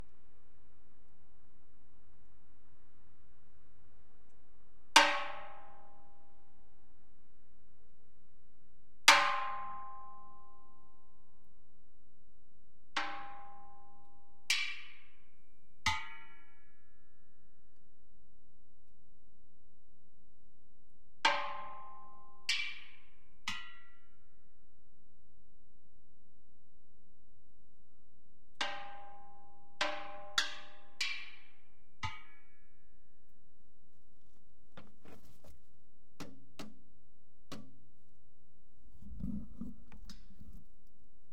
20131009 propane tanks
sample, percussion, drum, propane-tank, metallic, metal, ringing, clang
100, 20, lb, reverberant, tonalities, top, towards